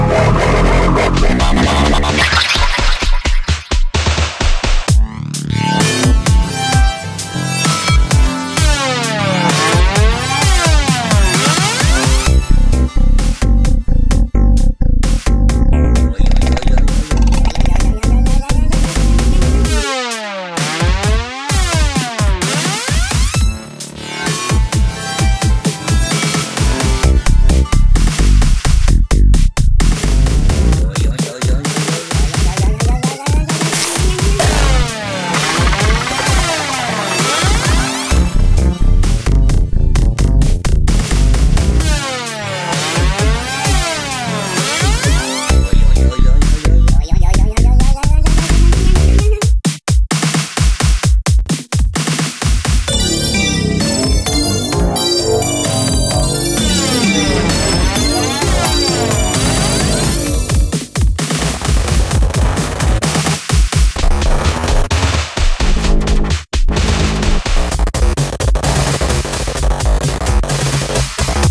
Inspirational Loop
I created these perfect loops using my Yamaha PSR463 Synthesizer, my ZoomR8 portable Studio, Guitars, Bass, Electric Drums and Audacity.
All the music on these tracks was written by me. All instruments were played by me as well. Inspiration
House, Music, Bass, Blues, EDM, Guitar, Rap, Keyboards, Country, Synth, Free, Classic, Beats, Dub, Traxis, Jam, Dubstep, Techno, Backing, Loops, Grunge, Rock, BPM